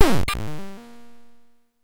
A glitchy percussion sort of sound with some high frequency and just generally weird. Created with a Nord Modular synth patch.